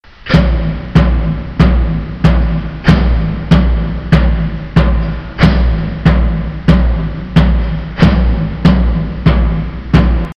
Mix of a recording of drums, recorded through a preamp. Reverb and effects added in Cubase.